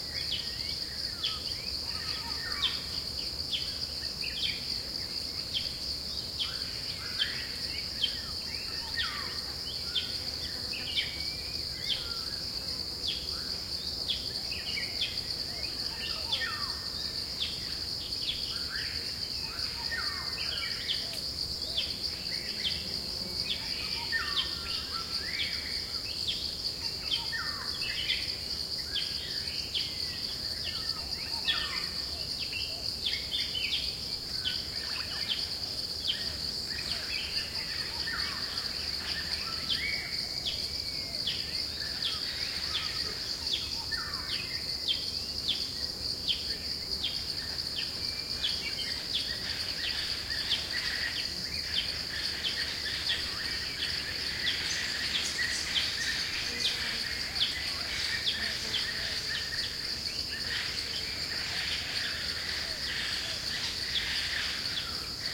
140927 jungle nature ambience.Chiangmai Thailand. Cicades. Birds(XY.SD664+CS3e)
nature, thailand, field-recording